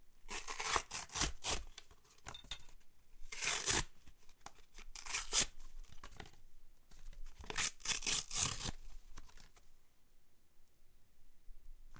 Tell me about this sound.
ripping soda carton 2
the sound of me ripping a soft cardboard soda carton. recorded in a professional recording studio with a SONY linear PCM recorder.
aip09, food, paper, soda